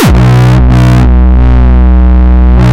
gabba long 004
gabba, kick, distortion